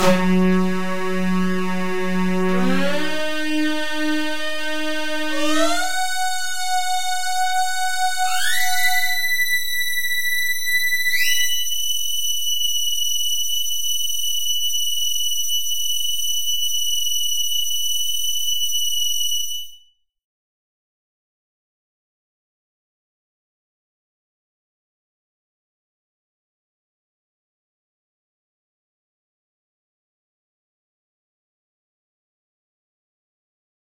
kng-sm-synth
Request for a synth sound as heard in Kool and the Gang's Summer Madness. Generated first wave form at F# (184.99Hz) and adjusted pitch to approximate the other notes in the series - using original recording as reference point. Added Chorus effect and re-verb with SoundForge 7 to finish.
electronic, synthesis, tones